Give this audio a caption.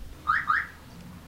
Carr 2bep
car bep being opened
car, door, open, bep, unlocked